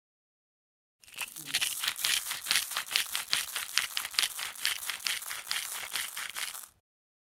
Sea salt mill